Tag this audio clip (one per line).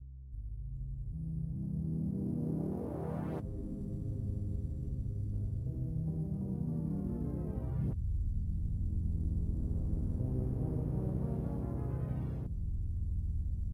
beats
sounds
weird